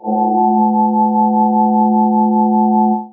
This sound was created with the technique invented and developed in my PhD, called Histogram Mapping Synthesis (HMS). HMS is based on Cellular Automata (CA) which are mathematical/computational models that create moving images. In the context of HMS, these images are analysed by histogram measurements, giving as a result a sequence of histograms. In a nutshell, these histogram sequences are converted into spectrograms which in turn are rendered into sounds. Additional DSP methods were developed to control the CA and the synthesis so as to be able to design and produce sounds in a predictable and controllable manner.